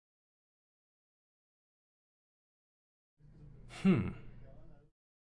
09-sonido de duda
This is a human doubt sound
doubt, human, voice